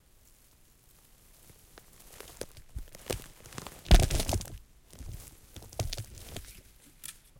Rotten tree trunk splits, close up, H6
A small old tree is breaking.
Recorded with a Zoom H6 / 41 khz / 16bit.
I used the XYH-6 mic.
trunk, break, tree, close-up, dark, small-trunk